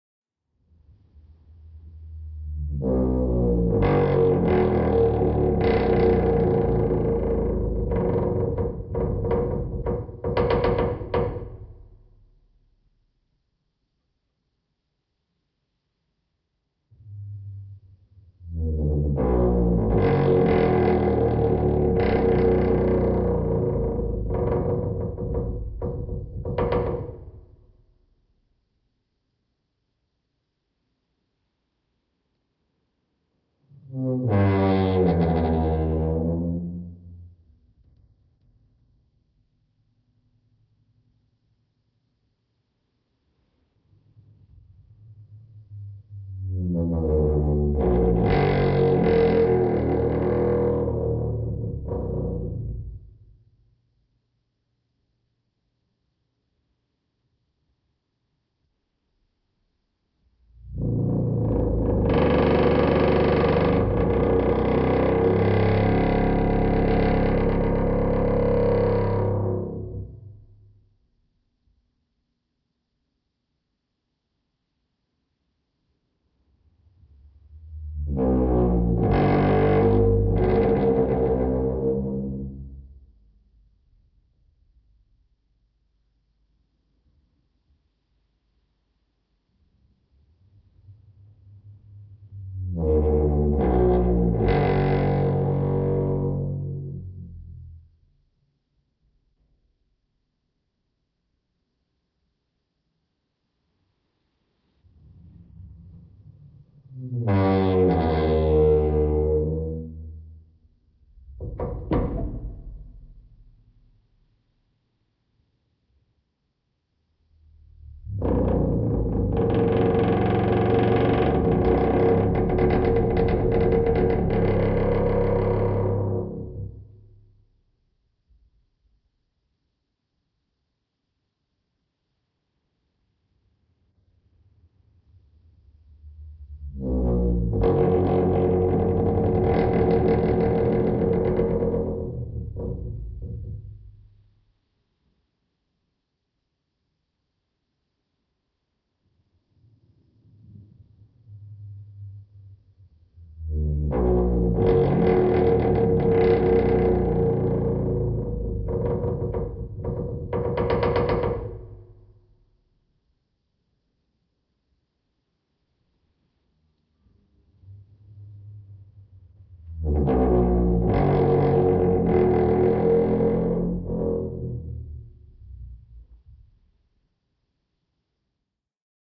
hinge slow motion
a recording of my squeaky studio door, time-stretched.
Elation KM201-> TC SK48-> dsp.
squeaky, dsp, low, time-stretching, hinges, squeak, time-stretched, slow, hinge, slow-motion, motion